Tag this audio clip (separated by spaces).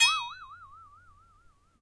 boing funny comedic comedy flexatone